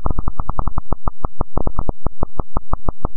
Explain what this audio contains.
A few short but strange sounds that came from my magical sound machines. It's like when you slow down white noise.
u, 7, 10, h, t, 5, 6, s, o, n, 0, d, 1, g, 4, c, 8, 3, l, 9, 2